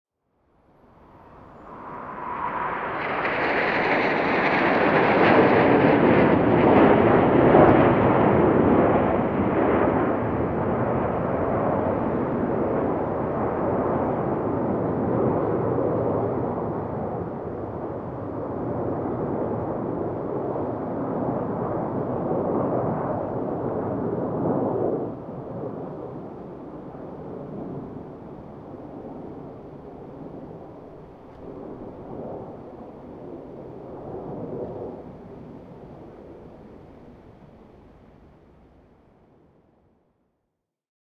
ps flighby 03
A single plane coming in from the right to the left, creating some nice reverbing sounds at the end of the recording. some low level sounds from bystanders. this is a recording with normal input gain.
aeroplane, aircraft, airplane, fast-pass, field-recording, fighter, jet, military, plane